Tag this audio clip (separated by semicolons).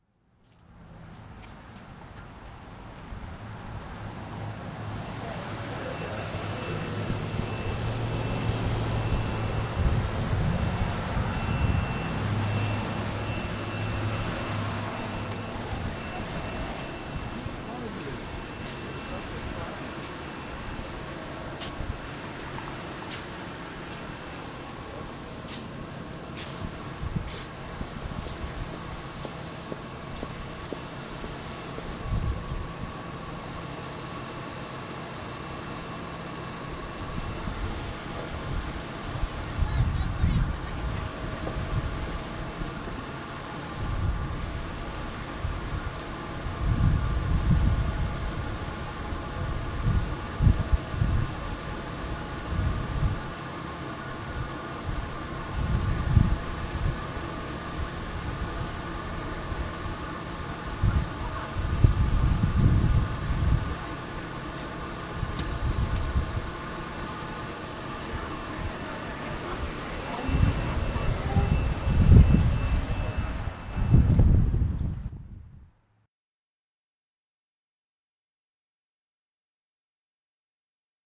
brake; bus; doppler; stop